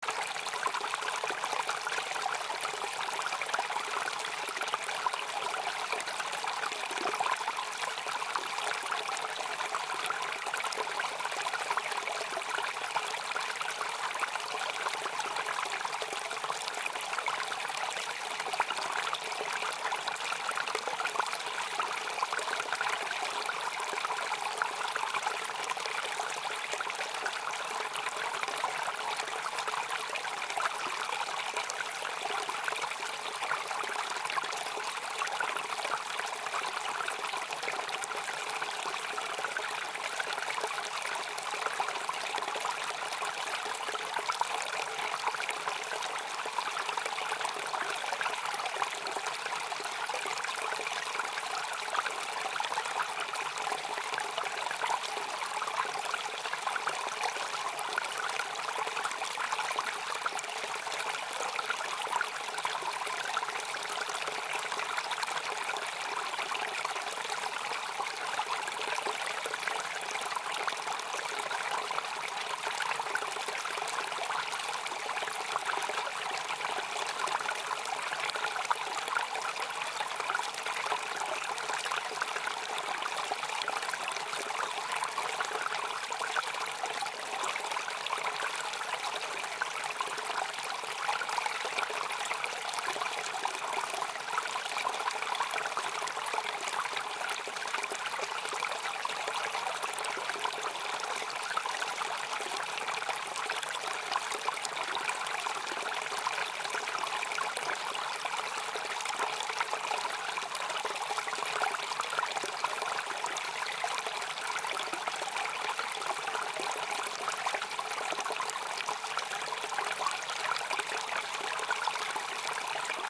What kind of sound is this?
Small stream around 800 feet above sea level, mountain above is around 1200 feet the water is about to travel through the rest of the trees before dramatically down to the sea in an extreme angle.
field-recording, stream, water